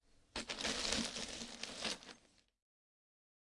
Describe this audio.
Bunch of sounds I made on trying to imitate de sound effects on a (painful) scene of a videogame.